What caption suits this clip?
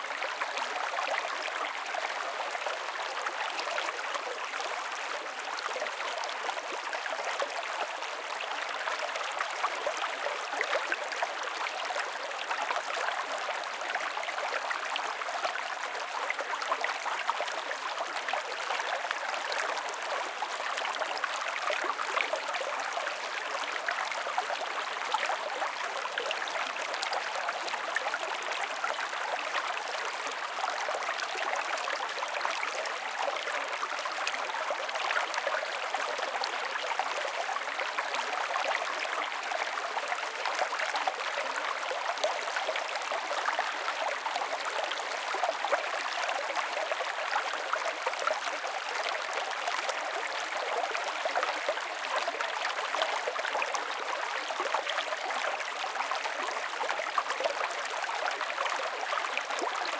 Small River 1 - Slow - Close
Collection of 3 places of a smaller river, sorted from slow/quiet to fast/loud.
each spot has 3 perspectives: close, semi close, and distant.
recorded with the M/S capsule of a Zoom H6, so it is mono compatible.
water-flowing, stream, water, perspectives